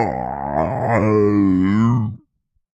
These are all me making terrible grunting growling snorting non-words for an offstage sea creature in a play but it could be anything monster like. Pitched down 4 semitones and compressed. One Creature is a tad crunchy/ overdriven. They sound particularly great through the WAVES doubler plugin..
monster, human, non-verbal, vocal, slurping, growling, voice, grunting, voiceover, snorting, creature, beast